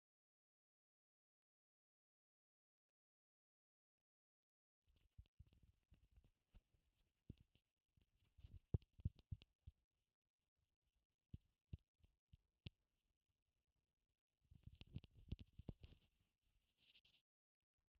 This sound was made by tapping the hydrophone microphone underwater while we recorded it via a Sound Mixer 633
loud, hydrophone